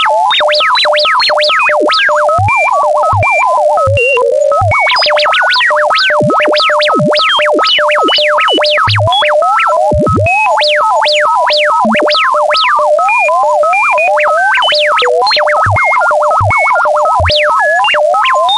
Sci-Fi Retro Alien Signals
Random frequency modulations in retro sci-fi style sound design.
Sounds were created with Super Collider programming language.